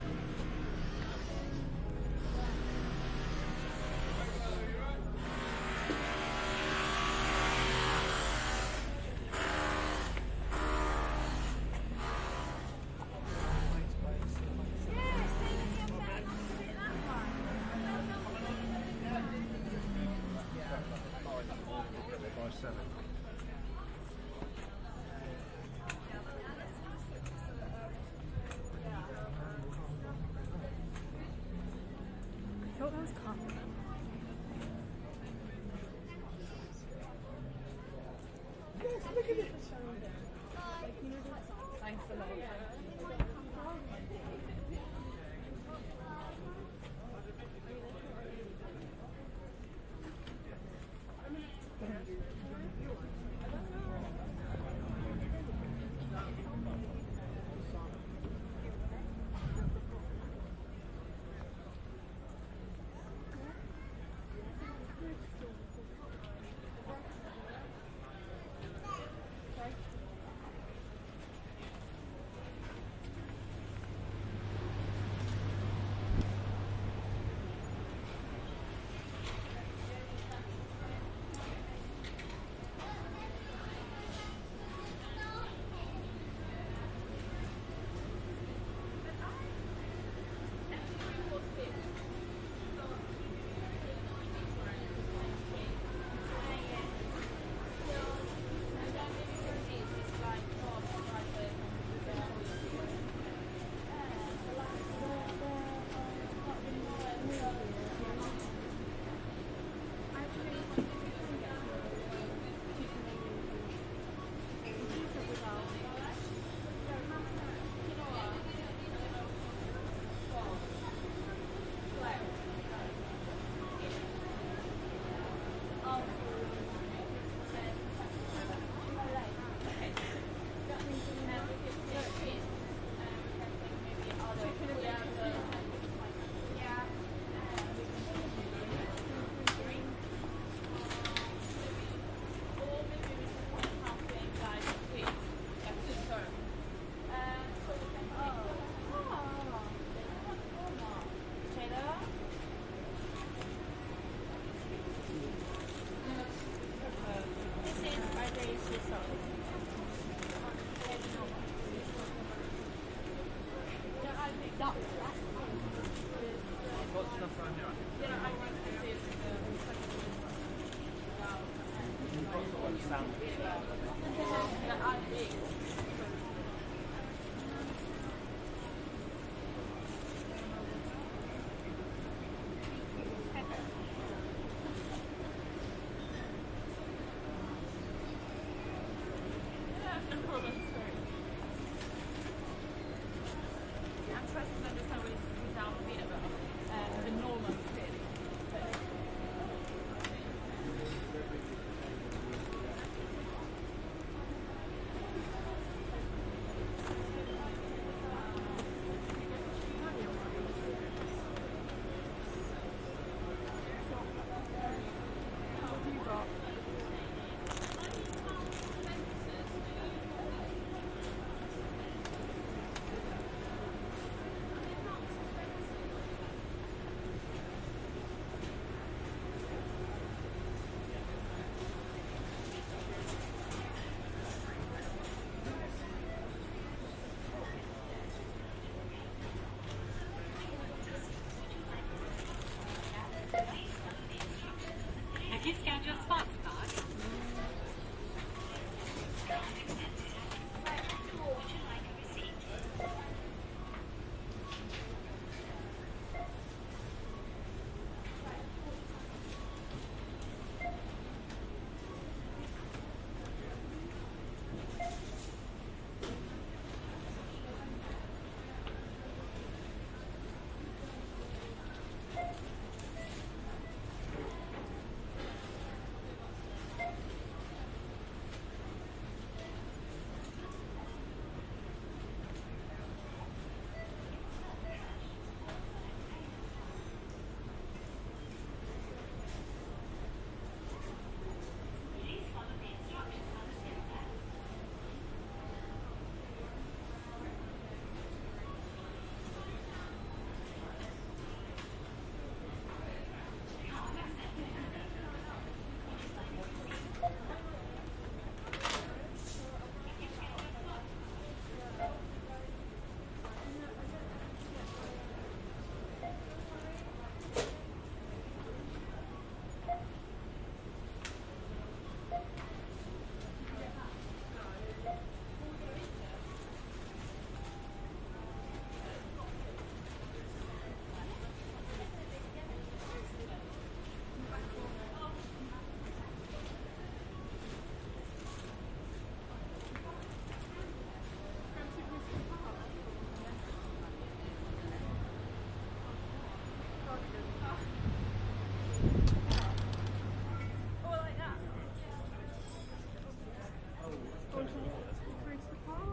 Binaural walk in Winchester
Walking through Winchester and going into M&S; to buy lunch. Zoom H1 with Roland in-ear mics CS-10EM
shop, walking, ambience, people